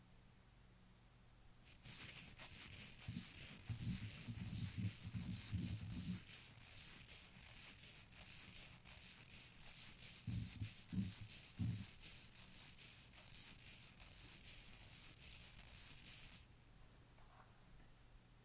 scratching dry
Recorded with Rode VideomicNTG. Raw sound so you can edit as you please. Sound made with me scratching to curtains together. Gives a somewhat dry scratching sound.